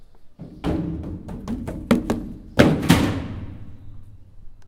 Running on stairs (Corriendo en escaleras)
Running on stairs
Running, ZoomH4N, Footsteps, Stairs